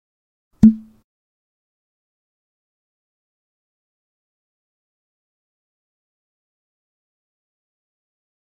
OWI CorkedBottle
cork being pulled out of a bottle
cork, ping, plop, pop, popping